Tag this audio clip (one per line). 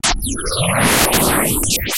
digital
electronic
future
noise
sound-design
synth
synthesis
synthesizer
synthetic
weird